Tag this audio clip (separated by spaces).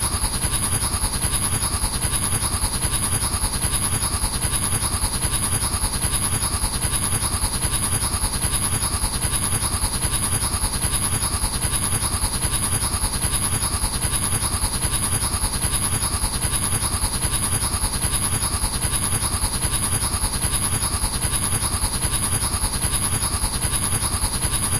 lazer laser drill beam sci-fi